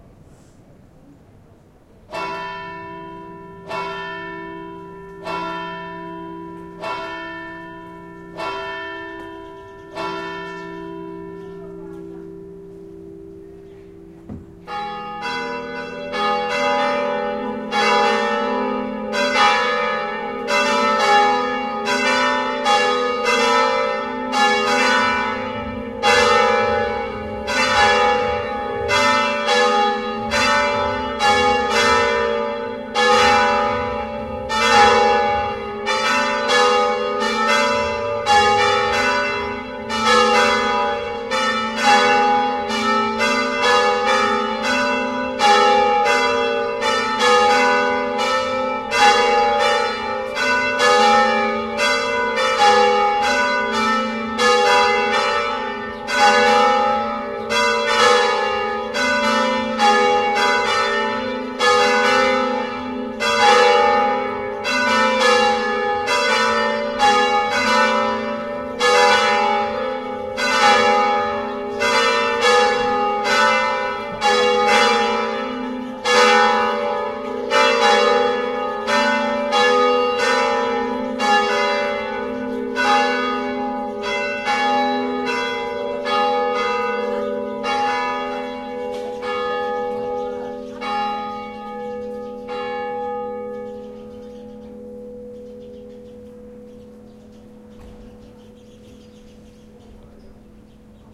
111013 - Sirmione - Kirchenlaeuten
field recording of the church bell in Sirmione.
field-recording, bell, church